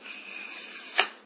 Telescope blindstick out
A white telescope blindsticks lower part is pulled out from the outer shell. Very special sound, kinda sounds like pump sucking out something. This is recorded with a Milestone 311 pocket memory, hence the mediocre sound quality, and finally processed with Audacity where compression and some hard limiter was applied.
eyes, handicap, sick, stick, visually-impaired